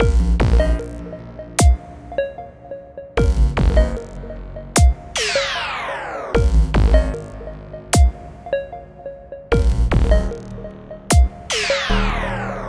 loop no4
Loop with a lot of reverb. cheers :)
delay, electronic, loop, melody, reverb, synth, synthesizer, wavetable